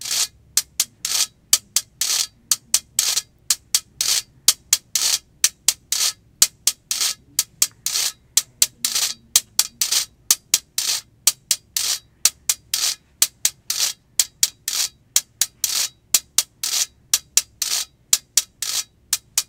YVONNE cheesegrater
The sound of a cheesegrater for music.
cheesegrater, kitchen, percussion